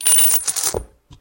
0031 Bottom Studs
Recordings of the Alexander Wang luxury handbag called the Rocco. Bottom studs
Alexander-Wang, Handbag, Hardware, Leather